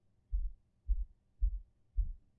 Low Pitch Knocking 1
Four low thuds or knocking noises possibly from someone or something knocking on a distant door or something walking on the floor above you. Recorded with a Blue Yeti microphone using Audacity.
bass, bump, ceiling, creepy, deep, door, eerie, floor, ghost, horror, knock, low, pitch, rumble, scary, second, sinister, spooky, stalk, step, suspense, thud, upstairs, walk